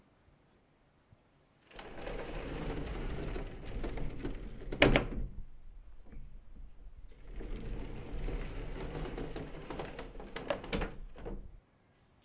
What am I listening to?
Sliding Door #2
Opening and closing a sliding door, homemade mechanism installed in the early 1960s, Janefield St, Launceston.
Recorded on a PMD661 with a Rode NT4, 19 October 2017, 10:10 am.
home, household, sliding-door